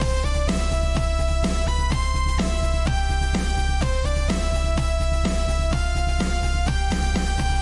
New Hope Loop
This loop was created using third party VSTs and effects.
Cinematic, Sample, Loop